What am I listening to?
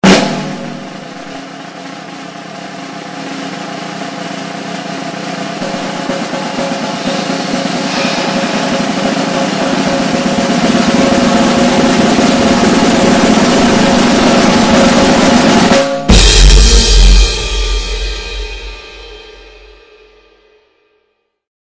16 Second Drum Roll with Cymbal Accent
The 16 second drum roll sound effect, with a powerful cymbal accent.
Sound ID is: 577613